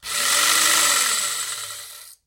Hedge Trimmers Rev
electric, hedge, industrial, landscaping, machine, motor, power, rev, start, tool, trimmer